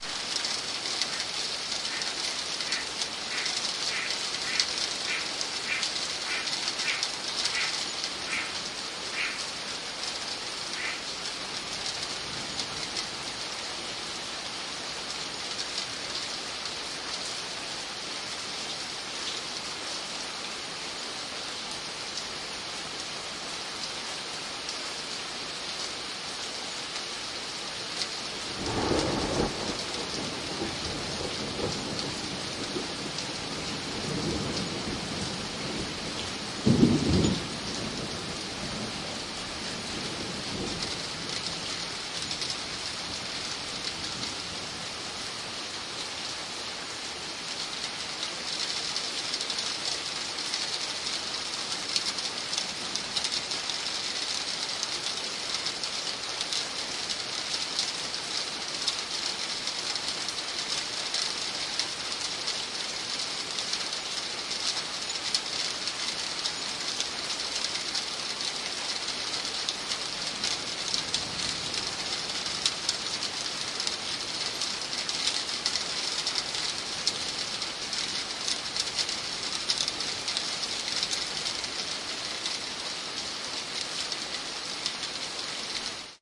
memorial rain more2frog
Memorial Day weekend rain and thunderstorm recordings made with DS-40 and edited in Wavosaur. The same renegade frog from the police manhunt appears the day before and sings on the patio during the rain.
ambience, rain, storm, thunder